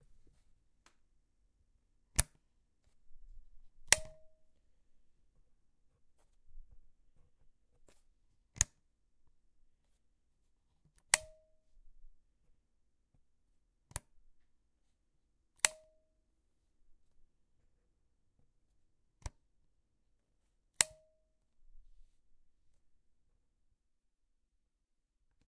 Light switch with two elements, one bassy and one resonant. Possibly good for user interface SFX.